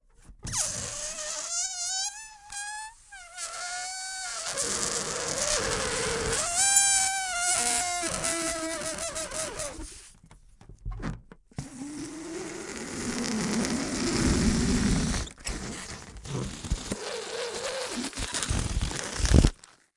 Fun with balloons :)
Recorded with a Beyerdynamic MC740 and a Zoom H6.